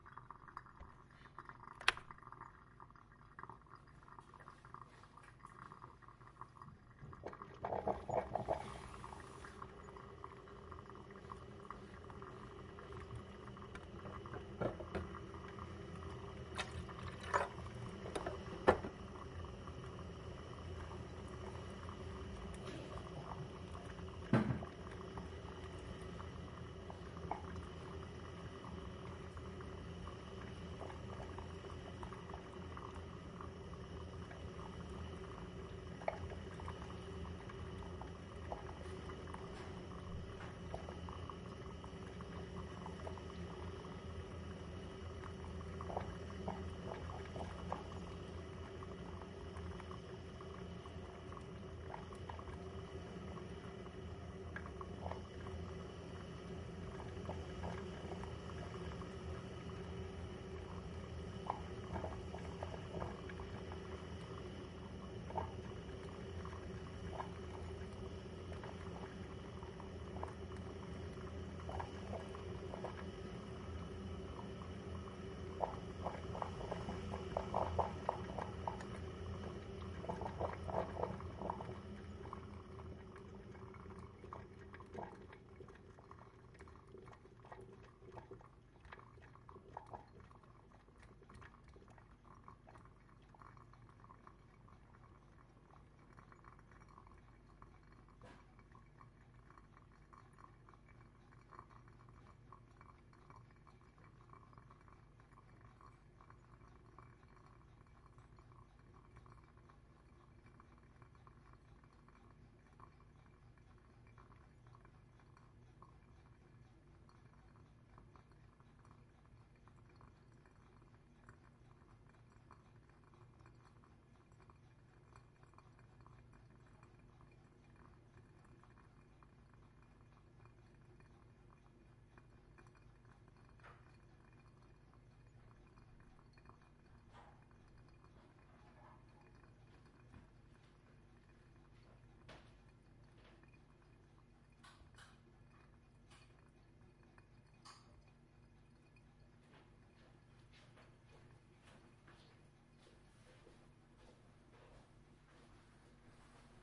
Recording of coffee machine making coffee. Recorded with Olympus LS 14. Can't remembet the coffee maker.
machine, coffee, maker